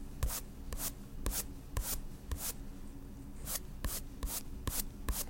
faster paint strokes 1-2
Paintbrush strokes, fast
fast, paintbrush